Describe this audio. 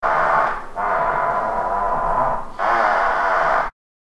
Great for creaky floors or chairs.
Squeak, High, Hum, Fan, Stereo, Creaking, Old, Creak, Creepy, Chair